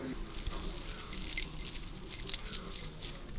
Sonido de llavero en movimiento.